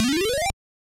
Arcade Level
Arcade Sound FX.